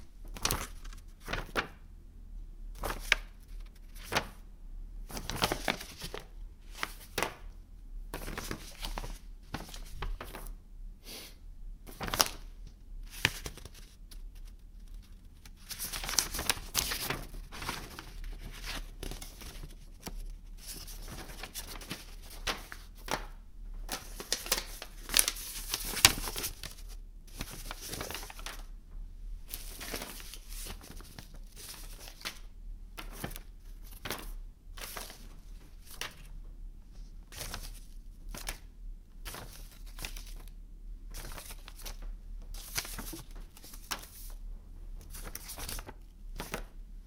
Paper handling
Picking up and handling various pieces of paper and mail